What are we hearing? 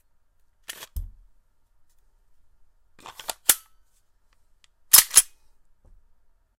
Glock 17 Reload
A reload of the Glock 17. recorded with a non-filtered condenser mic.
9mm, fx, glock-17, metal, pistol, reload, slide, weapon